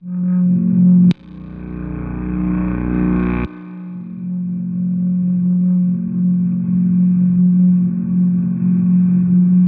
fade-distorsion
created live on laney vc30 with telecaster, tc delay, mxr disto+, ernieball volume - excerpt sample from my Black Bird EP
ambient, delay, distortion, guitar, space